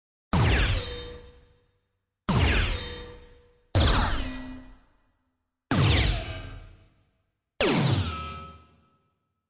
Heavy Laser
Laser made with Vital synth
Laser, SciFi